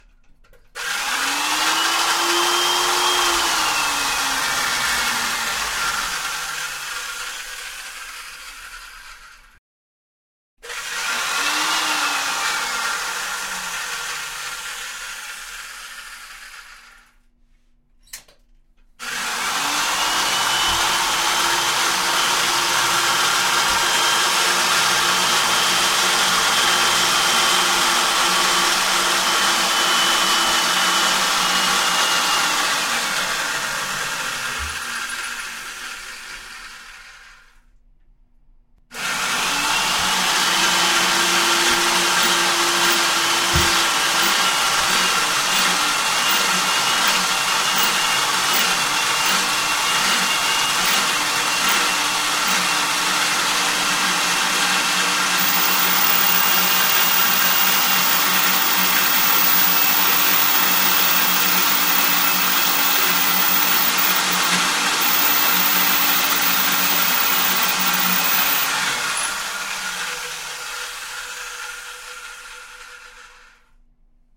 The sound of drilling (4 approach). Also short sound of start button (after the second approach).
At the 3 and 4 approach you can hear hi-frequency whistle, it's a sign what the bit dull.
noise, drill, building